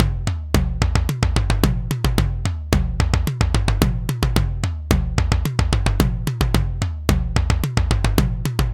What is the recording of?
A dense, danceable tom-tom groove at 110bpm. Part of a set.
drumloop, 110bpm, tom-tom